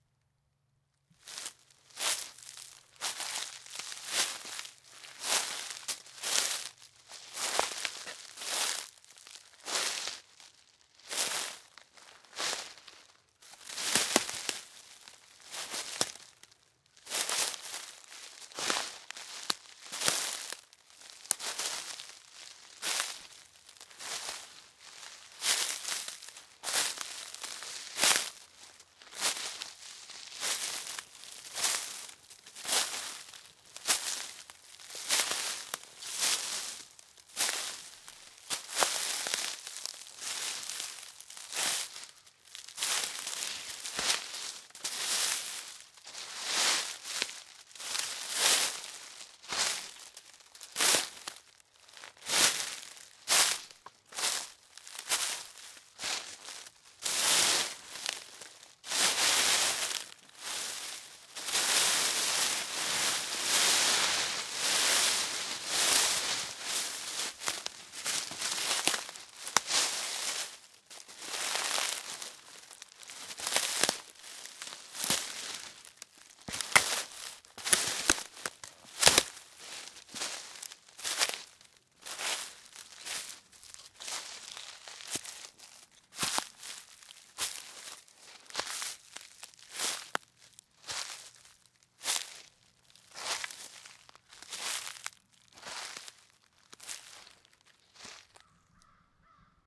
01-20 Footsteps, sneakers on dry leaves
Footsteps, shoes on dry leaves
Footsteps, sticks, woods, forest